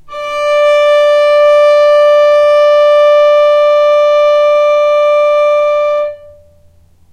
violin arco non vibrato